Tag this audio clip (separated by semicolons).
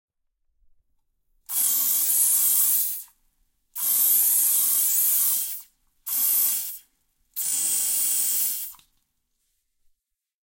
cleaning; house; housework